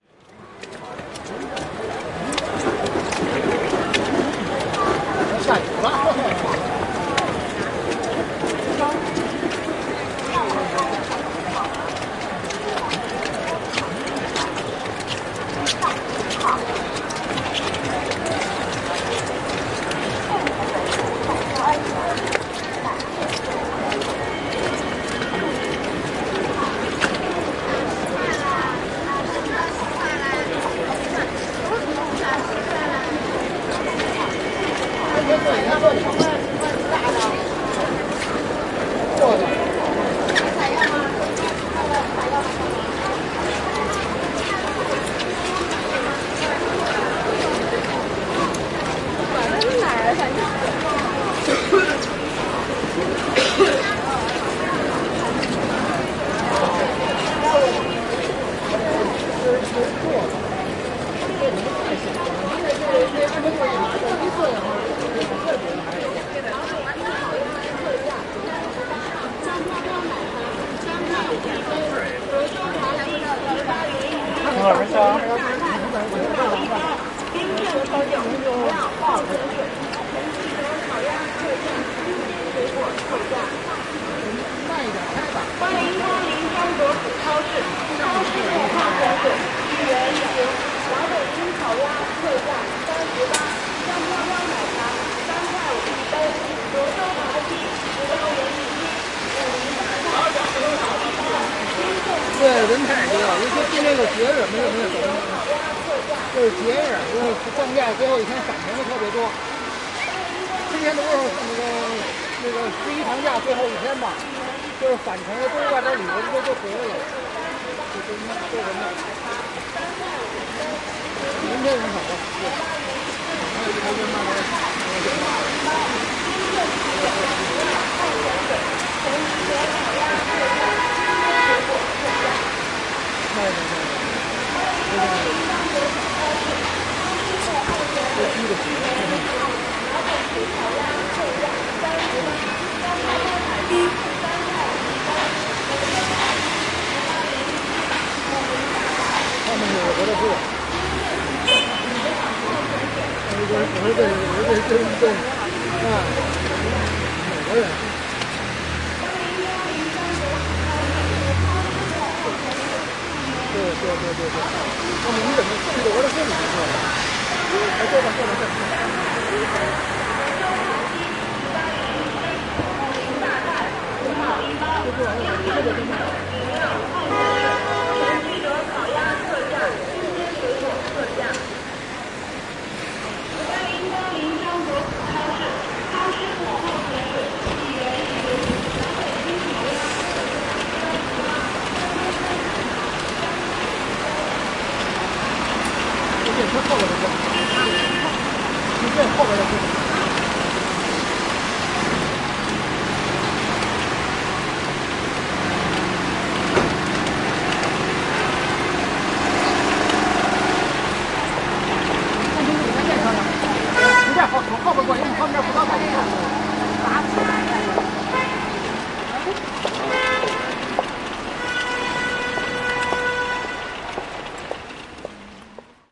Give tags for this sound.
asia beijing chinese crowd field-recording holiday international-travel people railway-station rain raining rainy station travel vacation weather

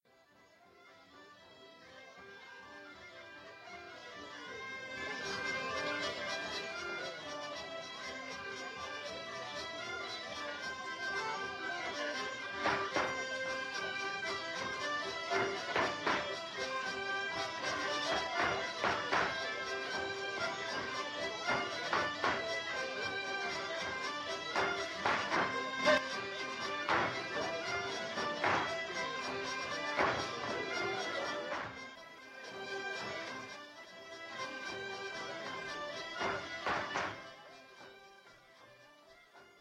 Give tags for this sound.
French Dance

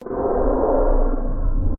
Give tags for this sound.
monster crawling beast creature

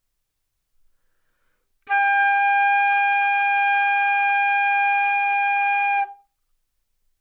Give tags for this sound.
G5 good-sounds flute single-note neumann-U87 multisample

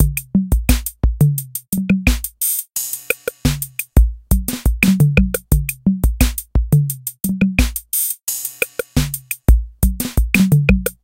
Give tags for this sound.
loops rap beats beat loop hip hop hiphop drums drum-loop

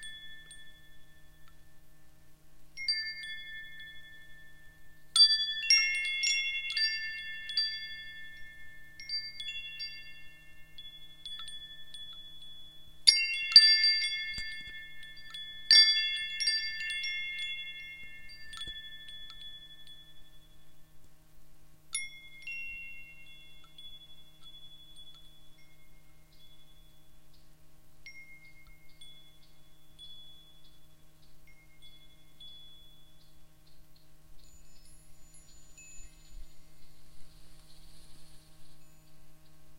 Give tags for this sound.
ring wind-chimes wind deep sound metal

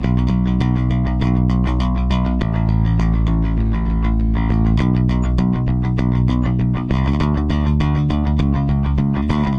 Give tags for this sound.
Funky-Bass-Loop Bass Funk-Bass Synth Ableton-Loop Soul Beat Funk Loop-Bass Fender-Jazz-Bass Jazz-Bass Fender-PBass Bass-Recording Compressor Hip-Hop Bass-Groove Bass-Sample New-Bass Ableton-Bass Synth-Bass Groove Bass-Samples Drums Synth-Loop Bass-Loop Logic-Loop

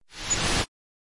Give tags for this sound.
Air Noise Rise White

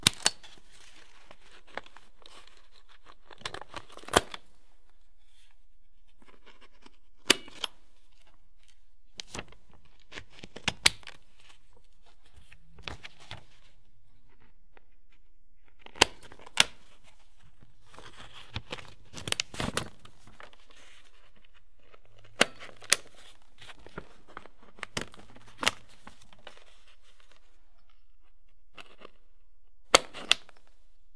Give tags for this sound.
paper staple office

